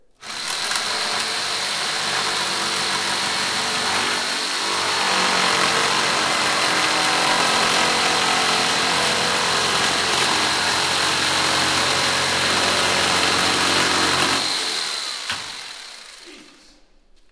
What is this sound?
Recorded using a hand saw to cut plywood in a workshop.
builder, construction, construction-site, cutting, drill, hacking, hand-saw, machine, saw, shop, table-saw, tools